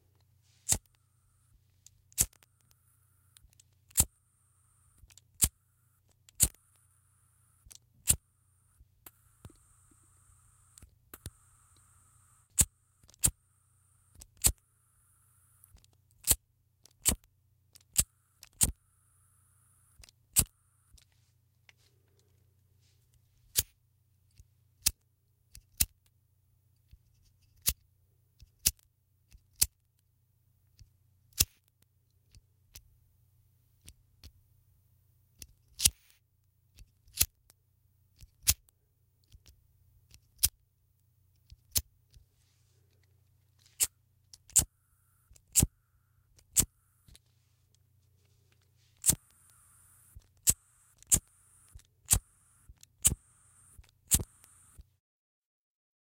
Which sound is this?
lighter burn gas flintstone flint